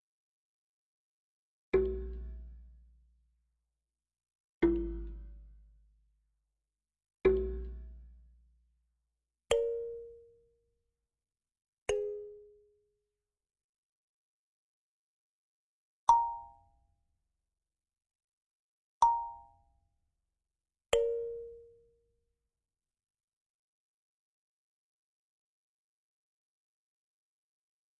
clangs cartoon
Multiple simple sounds to use in films and animations. Created with keyboard and sound program. Enjoy! You can also share this sound.
cartoon, clang, cling, dang, ding, dong, glass, hit, tap, tv, wink